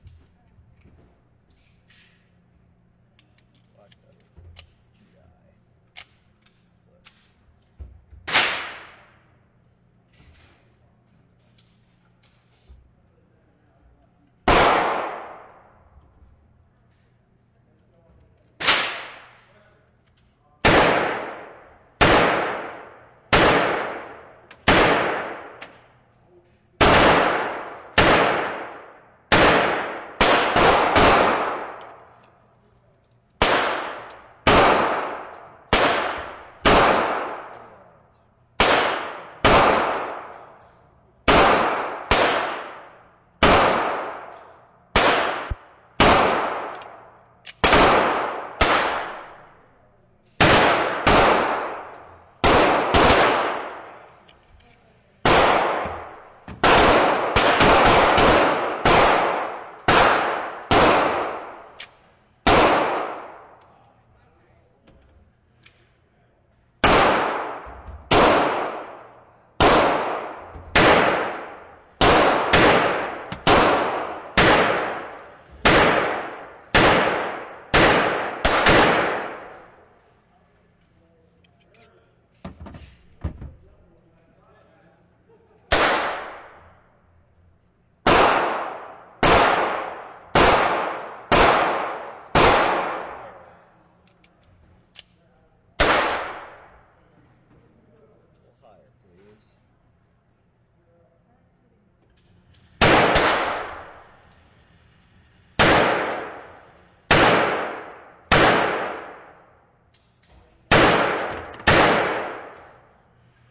Recorded in an indoor range using a Zoom H4, internal mics. The fatter sounds are large bore pistols, .45, .40 and 9mm. The thinner sounds are .22.